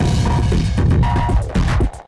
Crunchy lofi rendition of big beat. Exploding out of 9volt battery powered 30 year old drum machine, mixer and pedals.
Battery-Powered, Lotek, Explosive, Break-Beat, Lofi